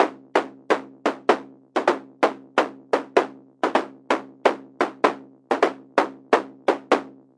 Perc Loop 5
Looped shots, rhythmic sounds for electronic experimental techno and other. Part of the Techno experimental Soundpack